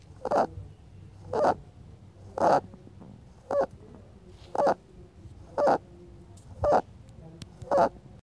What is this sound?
Rabbit Squeaking 1
One of my pet rabbits who has a bit trouble breathing sometimes and sounds like a squaky toy or guinea pig :)